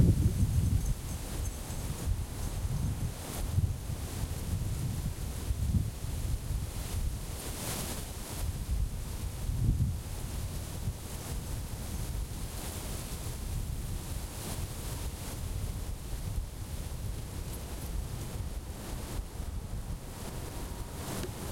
A recording of the wind in the trees on UMBC's Campus, with the tags on the trees swinging in the wind.